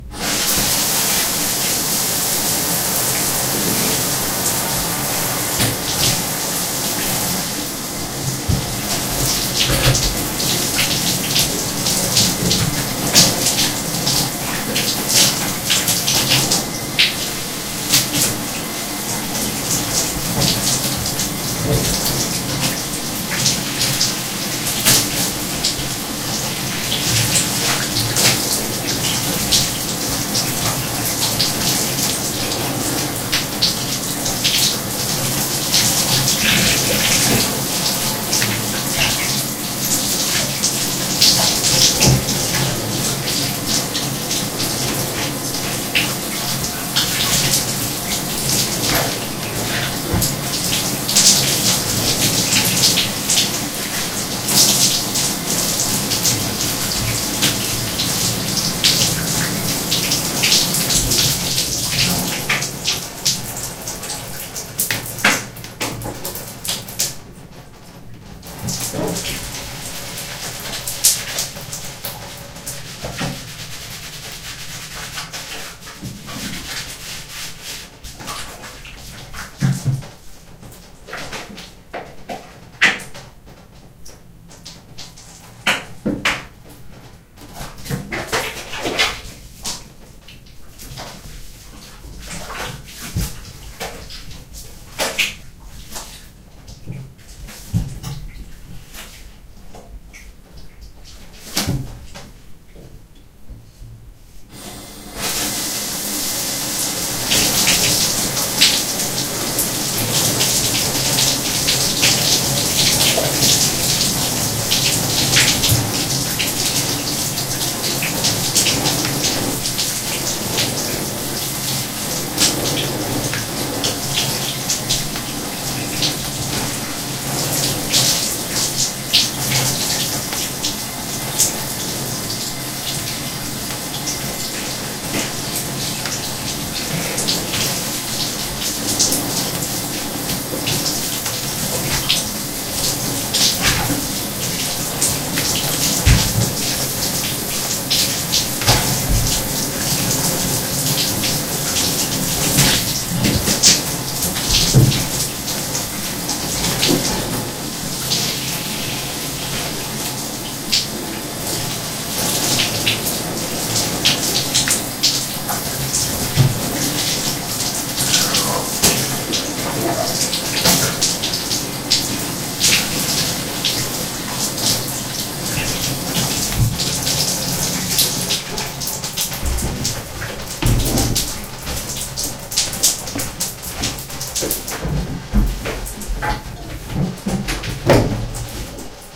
Taking a shower yesterday morning. Getting wet, rubbing in shampoo/soap, showering it off with a brush.
Recorded with Zoom H2. Edited with Audacity.